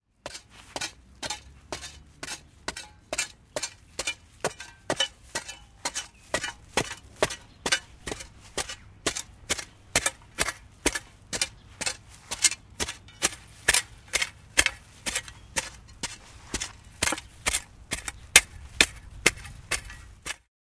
Garden Shovel
Spitting with shovel in soil, gardening